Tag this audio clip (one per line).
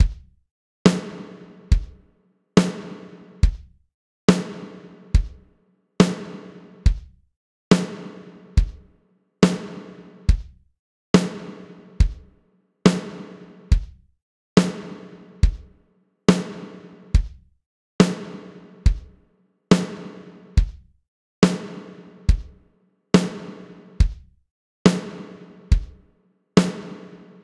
140
2step
320
beat
dubstep
future-garage
kick
snare